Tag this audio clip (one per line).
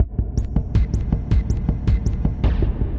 cosmos
drums
electronic
idm
loop
percussion
science-fiction
sfx
space
techno